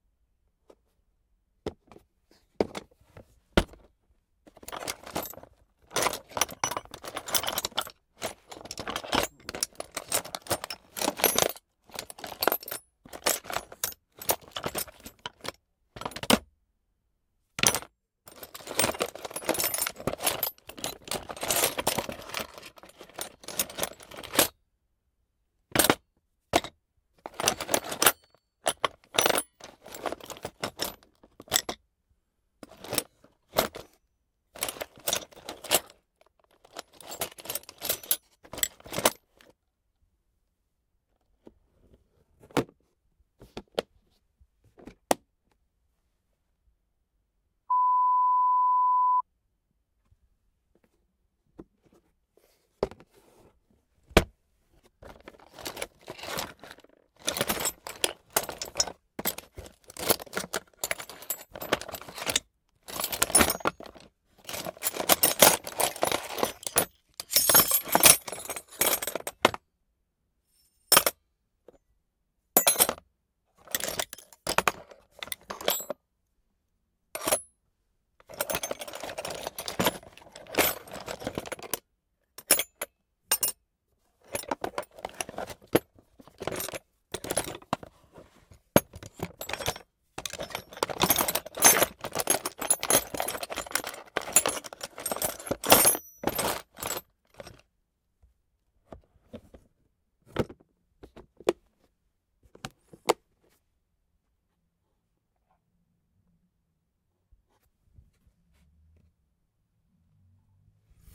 searching a toolbox. Plastic box with metallic and plastic tools. Mic: Neumann KM 185 Supercardioid, Zoom F8 recorder